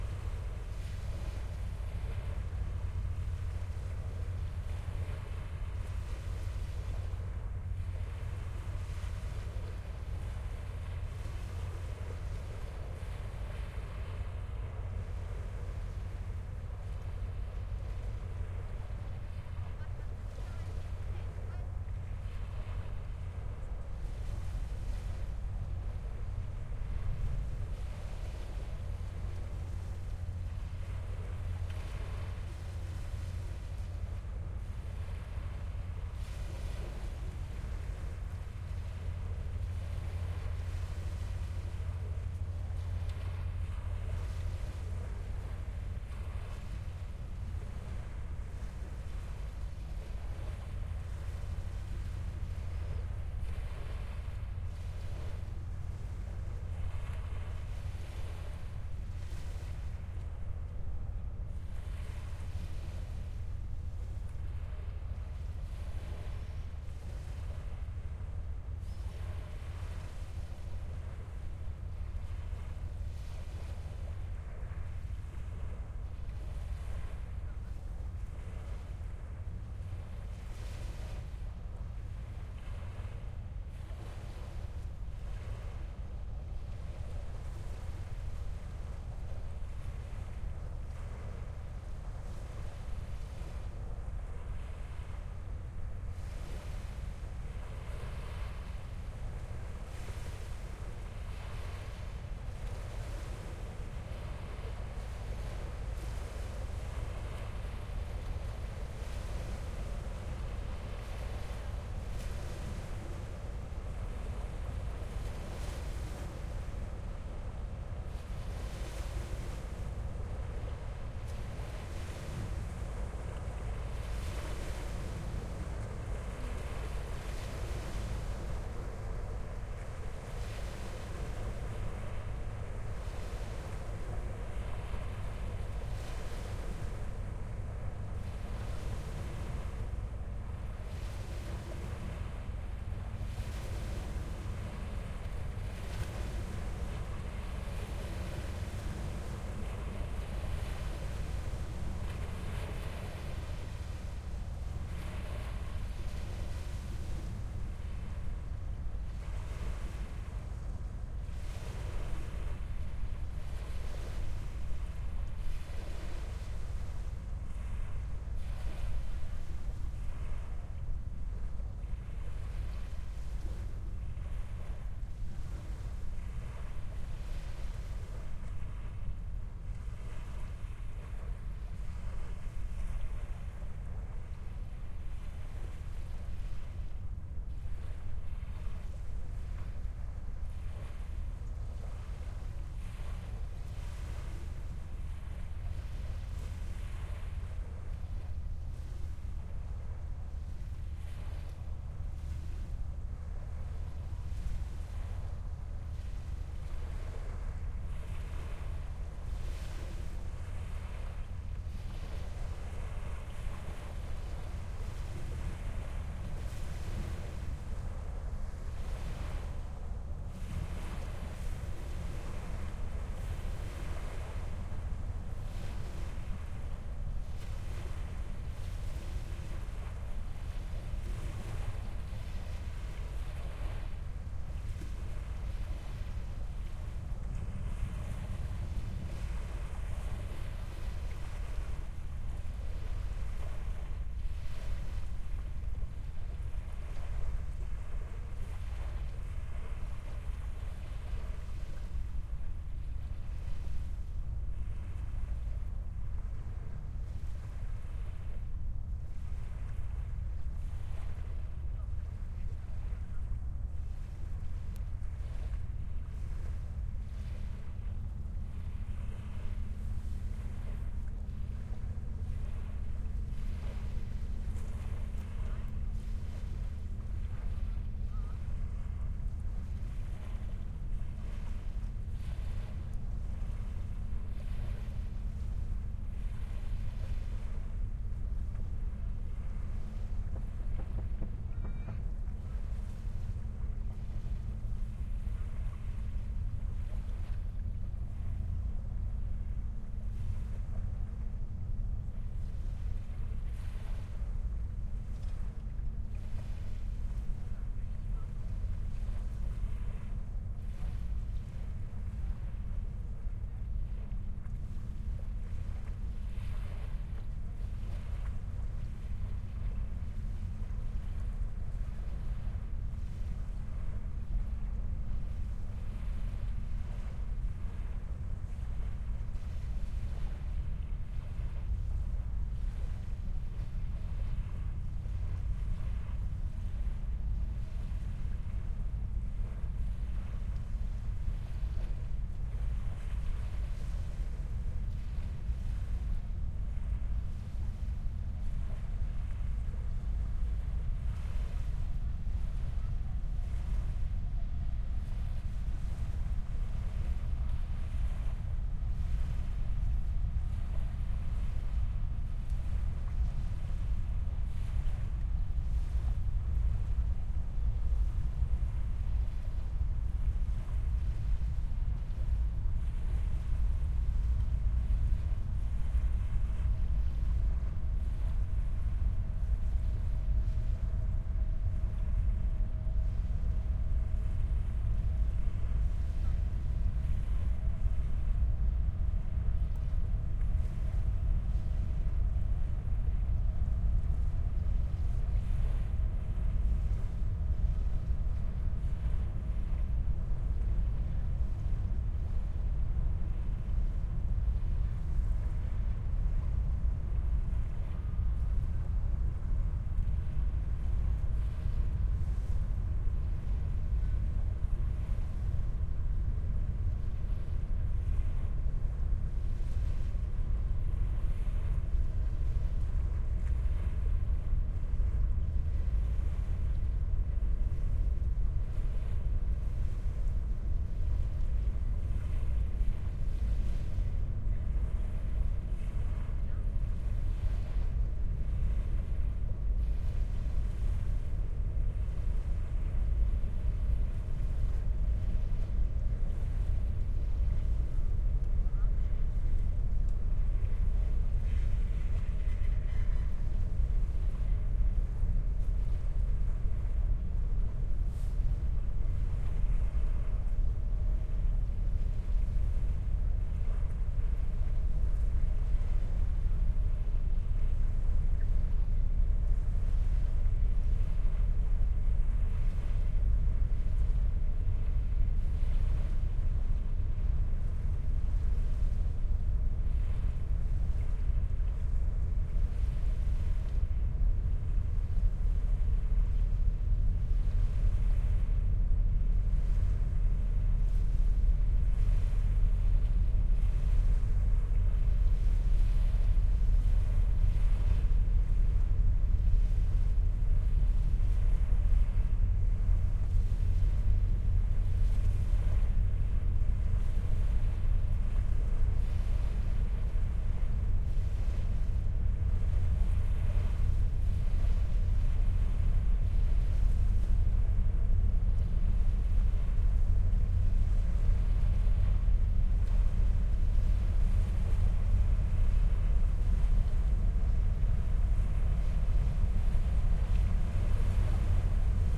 River Elbe near Hamburg
The river Elbe in Hamburg is a pretty busy waterway for all sorts of ships and still it has a quality, standing at the shore, of being at a beach. This track was recorded in July 2009 near Ovelgönne, which is part of Hamburg. For the recording two Shure WL 183 microphones placed on some rocks and the R-09HR recorder were used.
waves, river, field-recording, elbe, hamburg